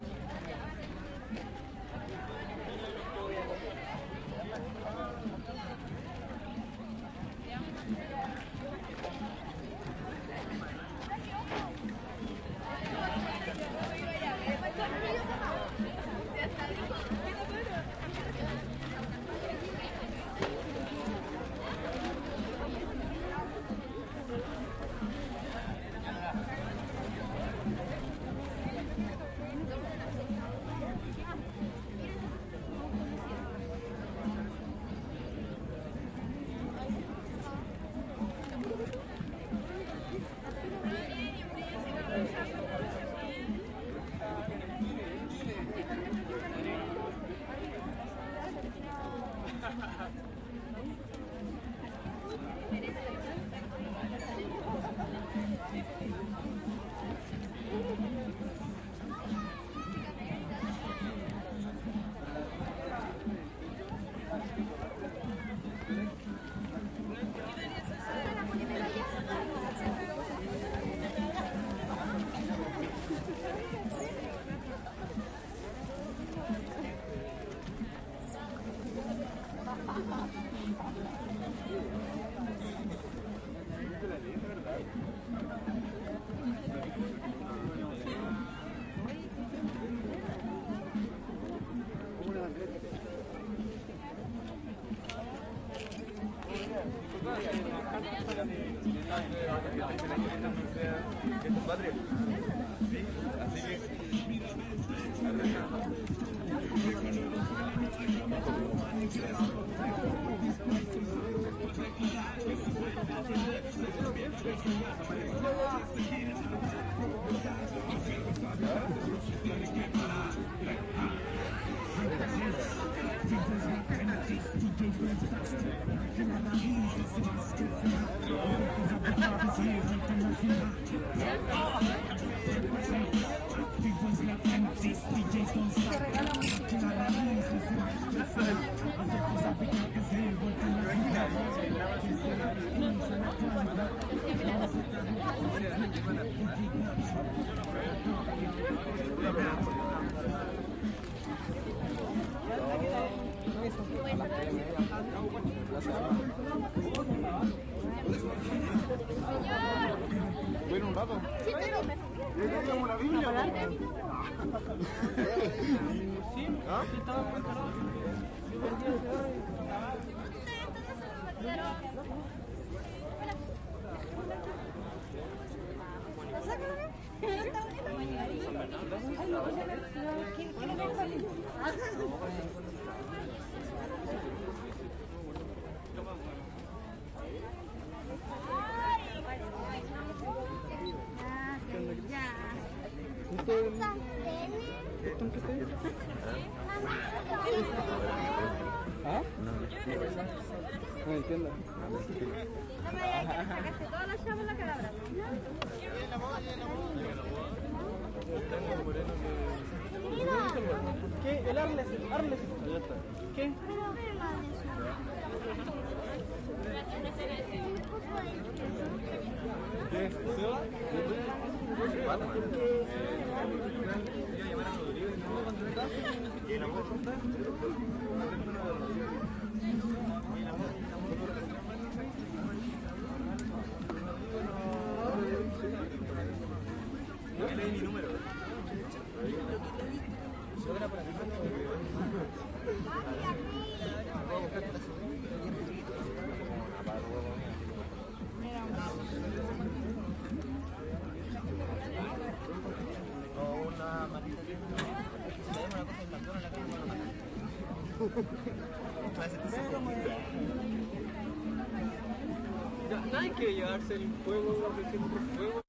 gratiferia - 04 quinta normal
Gratiferia en la Quinta Normal, Santiago de Chile. Feria libre, sin dinero ni trueque de por medio. 23 de julio 2011.